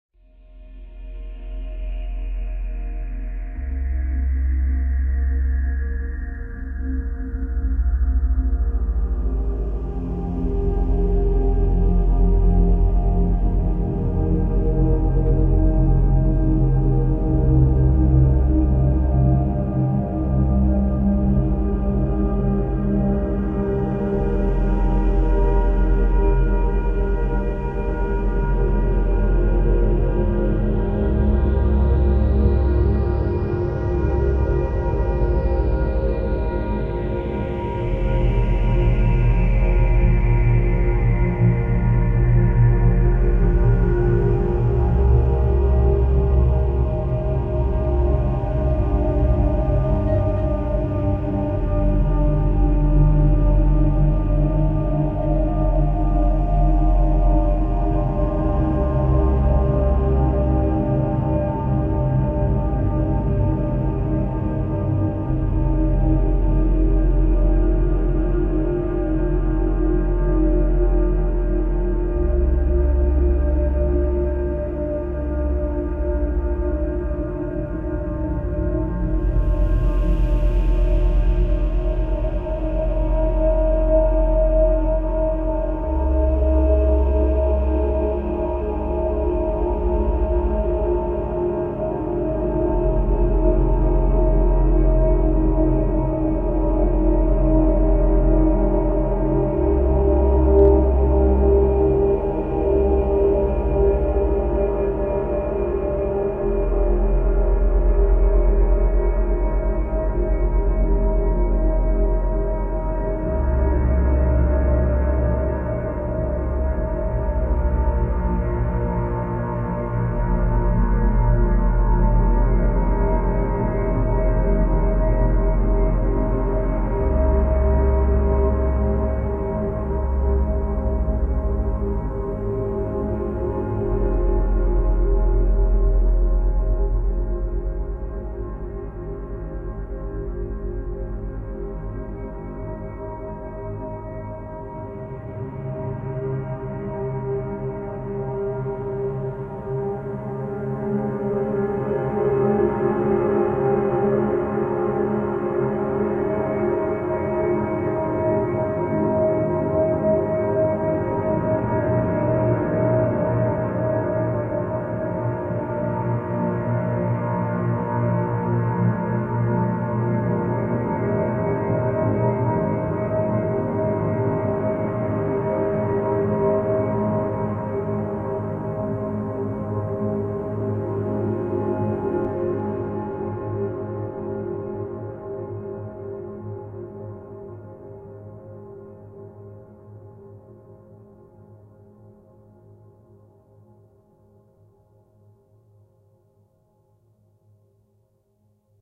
Ambient Wave 36
Combination of previous sounds.
This marks the end of Pack 3. I don't like going over half a gig on sound packs. So now onto pack 4 ;)
Ambient Wave 31
Ambient Wave 14
Elementary Wave 11
Ambiance, Drone, Drums, Cinematic